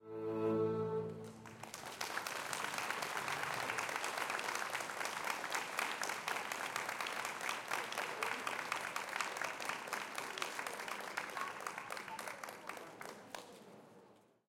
090812 - Rijeka - Pavlinski Trg - Quartet Veljak 5
Applause during concert of Quartet Veljak in Pavlinski Trg, Rijeka.